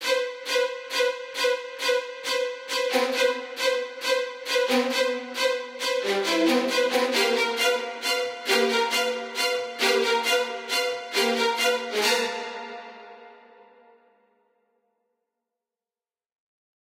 Impending Strings of the Macabre
A fairly long title for a fairly short sound. It was inspired by horror films from the 80's and early 90's. I believe this style could still be used today..even for comedy!
Atmosphere, Cinematic, Dark, Film, Horror, Movie, Psycho, psycho-esque, Scary, Scene, Sequence, Violin